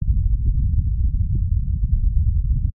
Thunder rumbling, underwater, outdoors Thunder rumbling
The sound of thunder from underwater.